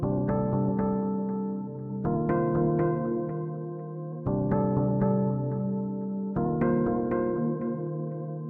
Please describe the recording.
A wavery sample with some pitch modulation, some notes played and it should loop well if you are into that sort of thing.